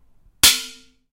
Knife Hit Pan Filled With Water 2
impact, metal, hit, pan, knife, struck